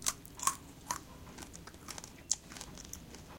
2 macho come
eat, mouth, tongue